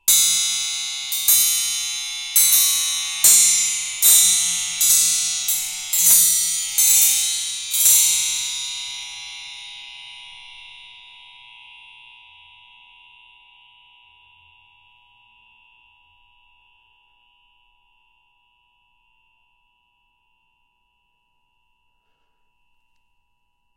A metal spring hit with a metal rod, recorded in xy with rode nt-5s on Marantz 661. Hit repeatedly